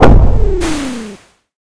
mech step 001
Single footstep of a mech/robot. Made for a game i'm working on, with audacity, from scratch.